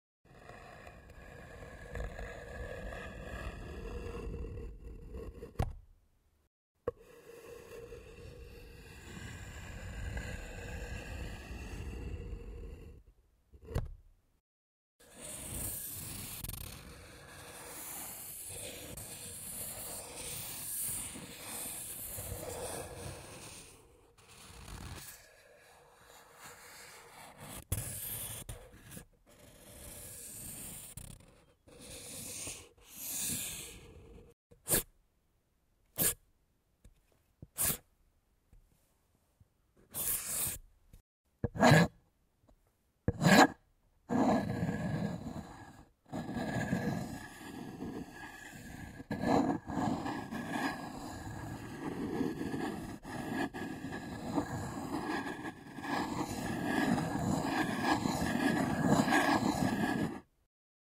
rascando madera 2
a, close-up, madera, rascar, scrap, u, wood